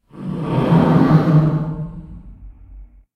More straw sounds. There are 7 individual tracks here, each a different plastic drinking straw sound given liberal doses of Audacity gverb and lowered in pitch between two and 6 steps or so, and layered one atop the other. I generally pan the lower sounds left and the higher ones right.
fi, sci-fi, science, fiction, horror, science-fiction, howl, roar, monster, sci